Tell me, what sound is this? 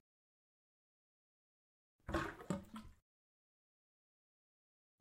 18.2 - water stopper
water drainage from sink